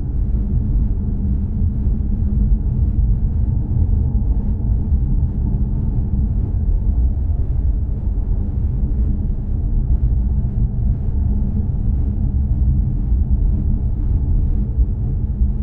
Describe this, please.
Low Rumble loop cut
A low rumble sound i designed and then edited for a ambient loop.